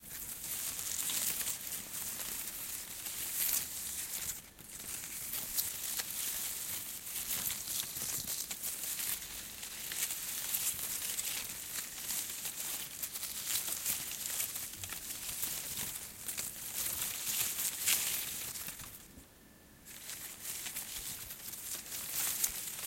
FXSaSc Moving Soft Plants Leaves Close ASMR 3
Moving Soft Plants Leaves Close ASMR 3
Recorded with Zoom H6, XY